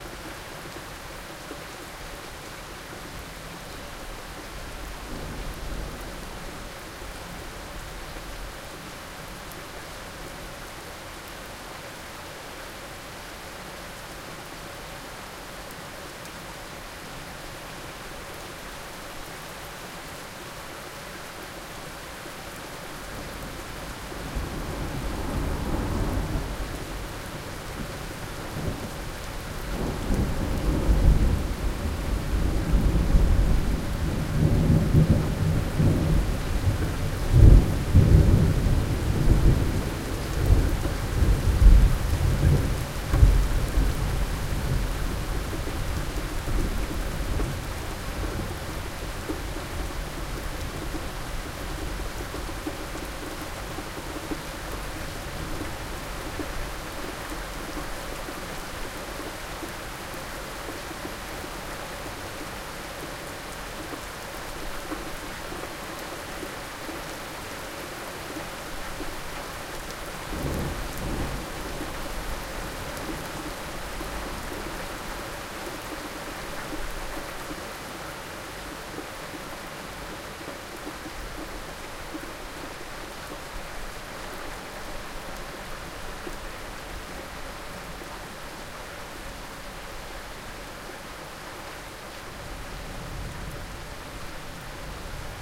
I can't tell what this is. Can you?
Thunderstorm in the night. A lots of rain and thunders.